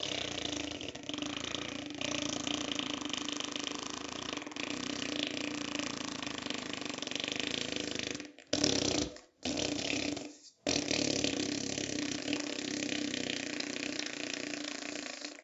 This is the sound of a car hunk.
foley, hunk, cartoony